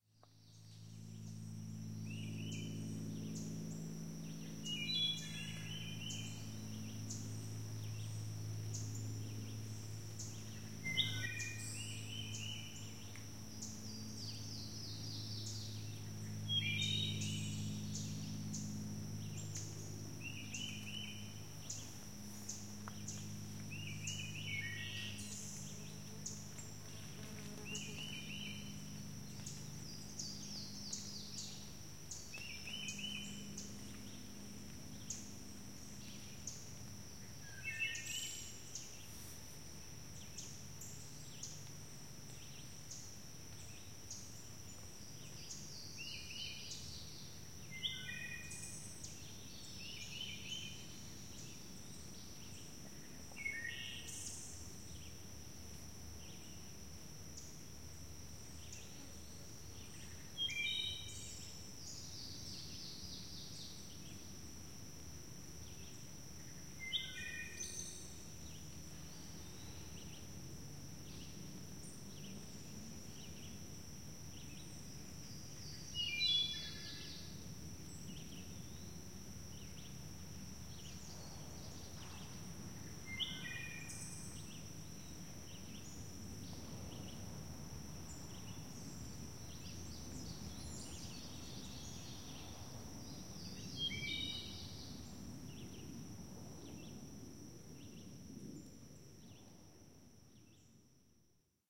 This recording features, what many would say is the most gorgeous bird-song in the midwest---the flute-like wood-thrush. Recording made in July in deep forst; a plane is lazily droning overhead, and the ever-present chorus of summer insects is heard. Made with Zoom H4N using the internal microphones.
july, forest, droning, plane, peaceful, Summer, woods, field-recording, ambiance, soothing, wood-thrush